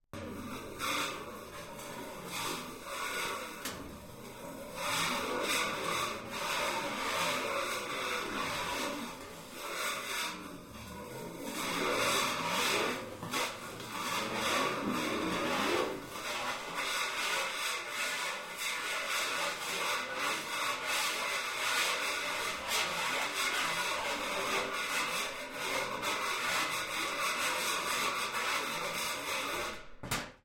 Rubbing metal scissors against a thin sheet of steel
close-mic, drone, metal, metallic, resonant, texture